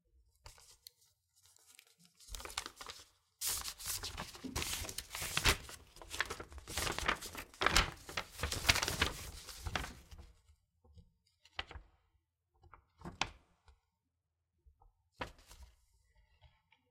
Opening letter and handling paper
Opening a letter, taking the paper out and handling it
recorded with sennheiser 416 on zoom H4N pro
letter, paper, paper-handling